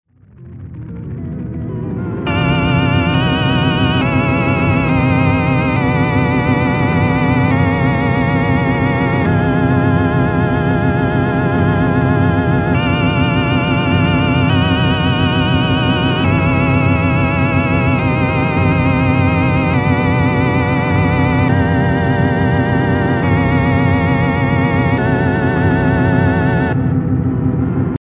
bad ending of video game ORGAN
when the evil guy kills you